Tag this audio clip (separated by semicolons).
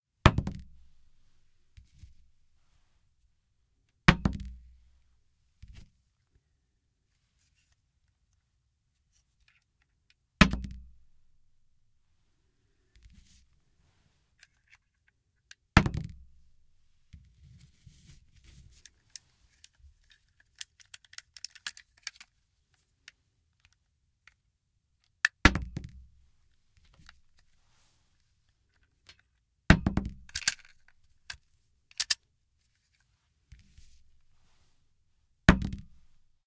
bullet; dropped; foley; gun; movement; revolver